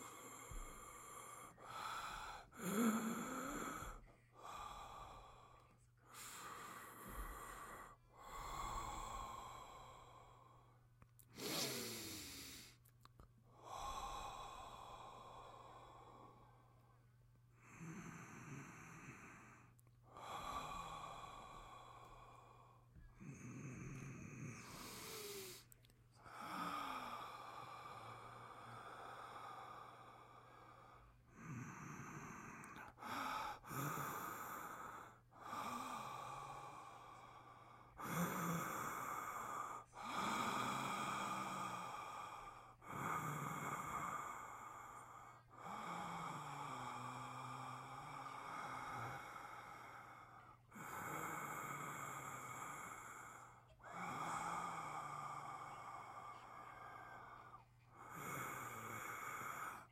man breathing deep